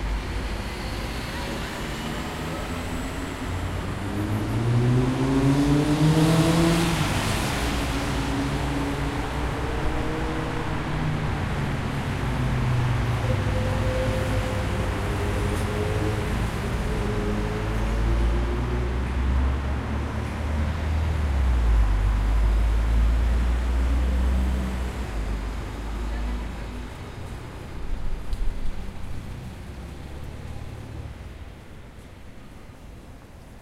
Car and motorcycle traffic in Rue Blanche - Paris - France.
Recorded with a Zoom H4N, edited with Audacity under Ubuntu Debian Gnu Linux.